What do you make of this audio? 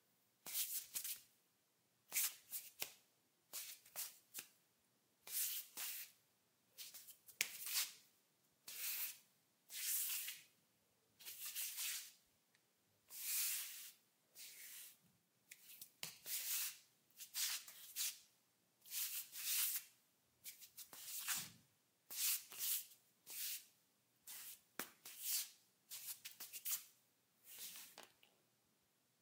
01-14 Footsteps, Tile, Male Barefoot, Scuffs
Barefoot scuffing on tile
barefoot, kitchen, tile, linoleum, scuff, male, footsteps, scuffs